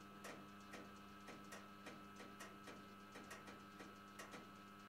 Machine(loop)
The sound of a sauna stove.
machine, mechanic, oven, sauna, stove